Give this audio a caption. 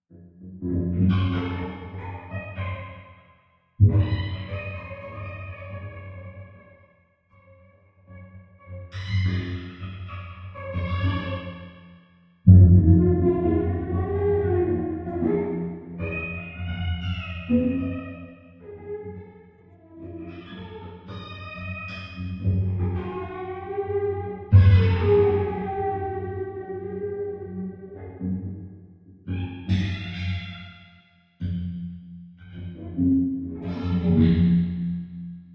What is wrong with these kittens??! o_o
Don't mess with them, I think they're from hell.
This used to be a guitar once. I love my Pod x3.